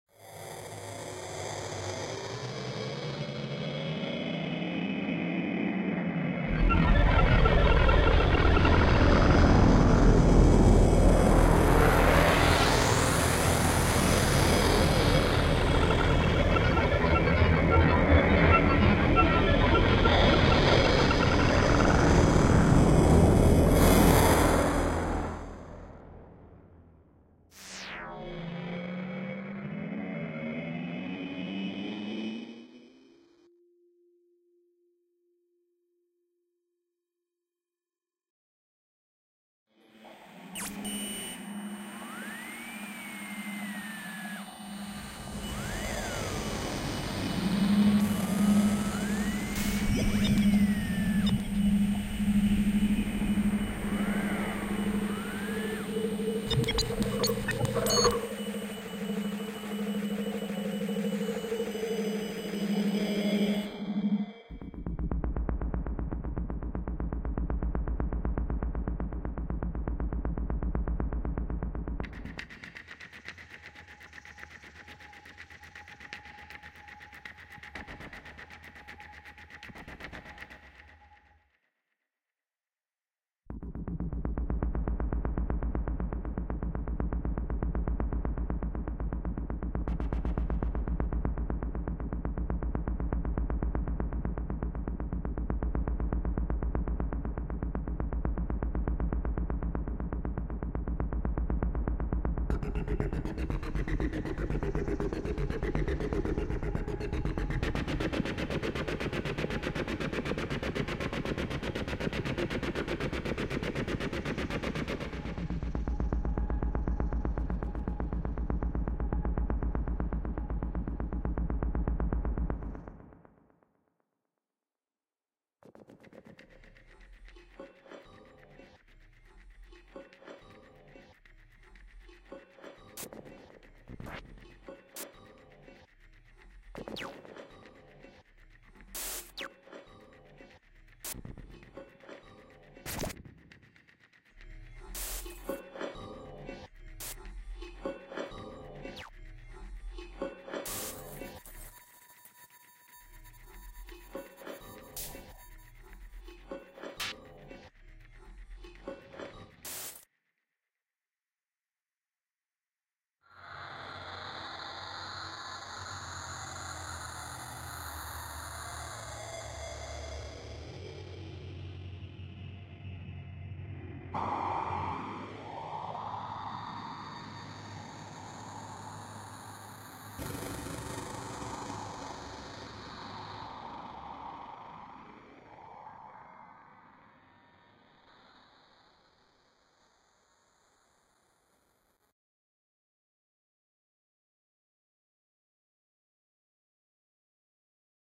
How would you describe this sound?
Abstract sounds created by Doug Nottingham for Richard Lerman's Sections realization using Apple Logic, Moog Voyager and .com synthesizers.